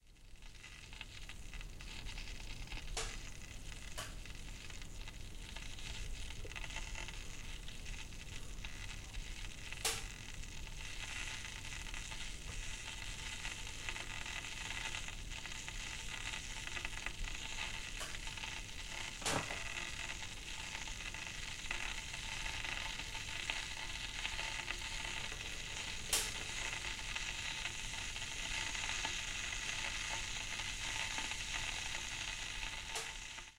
The sound of a small amount of water being boiled off in a saucepan.
Boiling, water-sounds, kitchen-sounds, saucepan